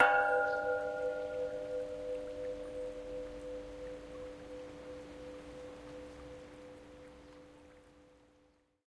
Aluminium Pole 3
A recording I made hitting a large metal pole I found in Kielder Forest, Northumberland UK.
Recorded on a Zoom H2N, normalised to -6dBFS with a fade out.
bird, birds, birds-in-the-background, field-recording, metal, nature, sonorous, water